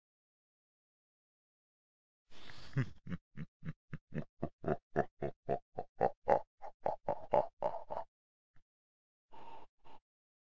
Evil laugh
Evil, Ghost, Growl, Halloween, Laught, Movement, Nightmare, Scary, Science, Scifi, Space, Spooky